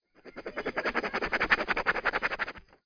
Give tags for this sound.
autograph paper pen scribble sign signature signing write writing

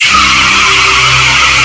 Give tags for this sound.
Electronic Noise Alien Machines

